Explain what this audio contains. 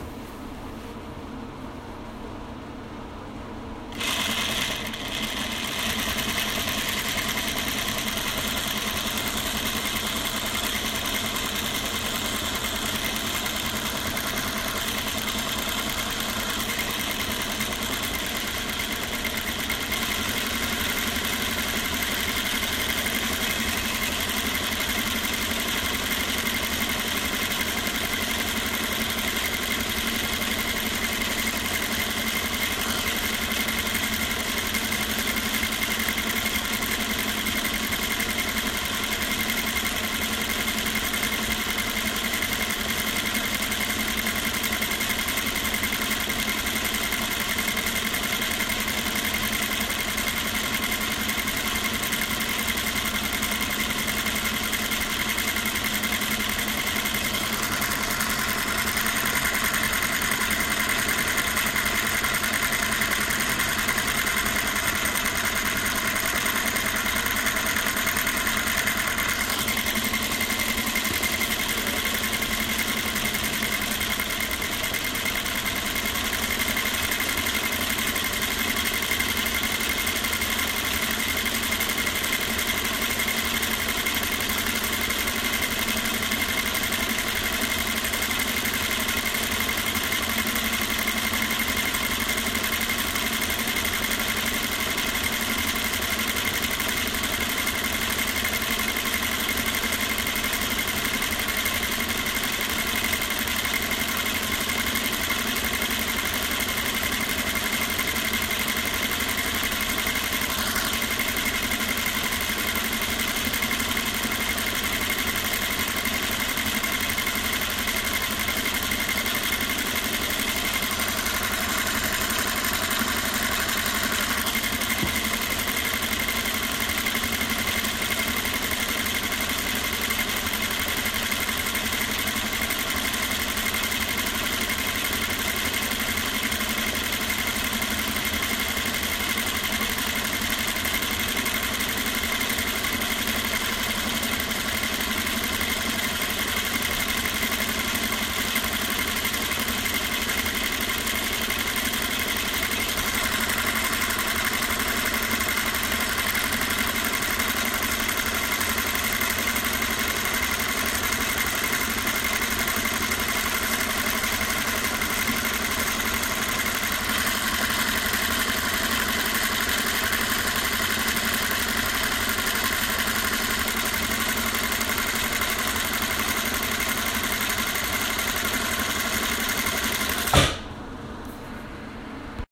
Sewing machine noise, including start and stop
sewing-machine, mechanical, sewing, machine